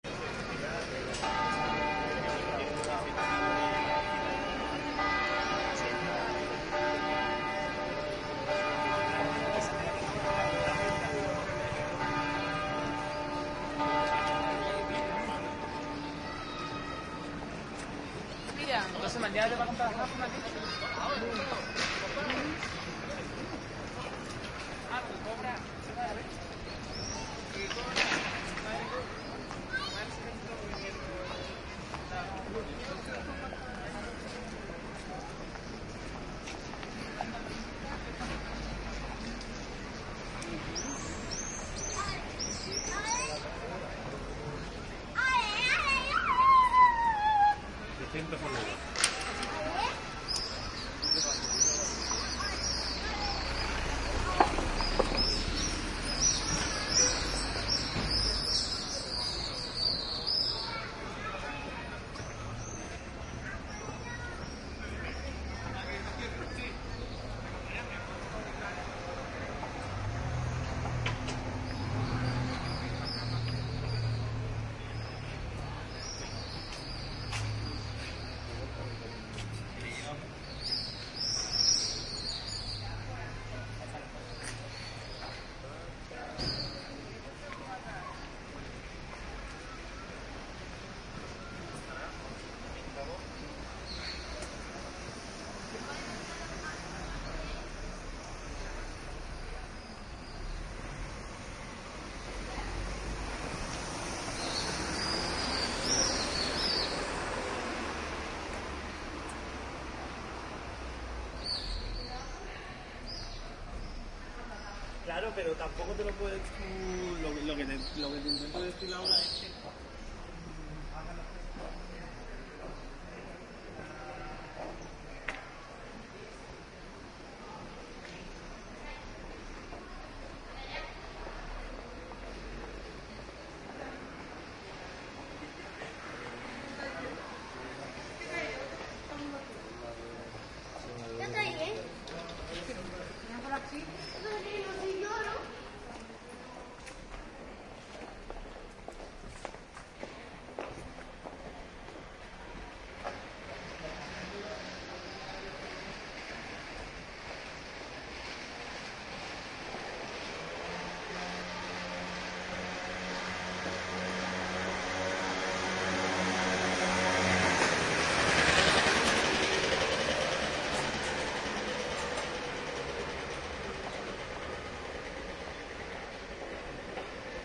street ambiance, with a clock striking 8 pm, people talking in Spanish, screeching from swifts, some (little) traffic noise. Recorded as I walked in Seville (Spain) using a pair of Soundman OKM mics (in-ear) and Edirol R09 recorder